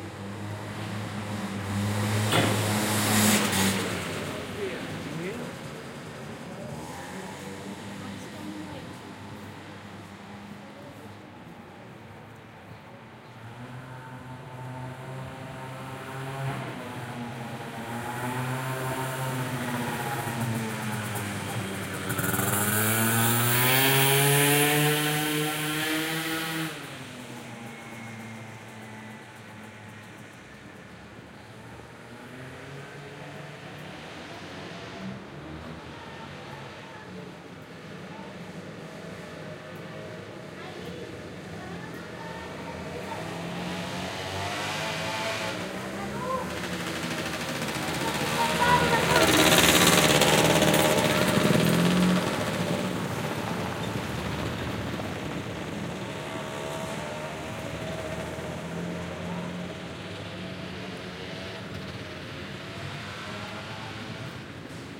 three socooters passing by.
city, sevilla